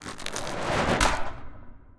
drag trash snack bag
Dropping snacks to trash, Recorded w/ m-audio NOVA condenser microphone.
also used a metal can from stock to complement the effect.
snack, bag, trash, doritos, papas, tirar-a-la-basura, envoltura